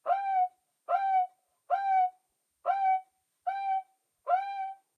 Different examples of a samba batucada instrument, making typical sqeaking sounds. Marantz PMD 671, OKM binaural or Vivanco EM35.
samba, rhythm, drum, groove, pattern, percussion, brazil